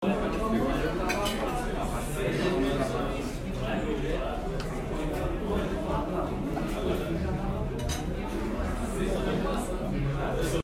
Restaurant - environment sound.